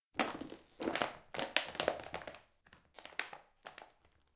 Plastic bottle squashed
Empty plastic bottle being squashed on a carpet. Recorded with Zoom's H6 stereo mics in a room. I only amplified the sound.